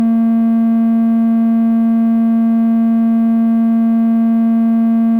Triangle wave @ 220hz from Roland Modular synth: System 100M.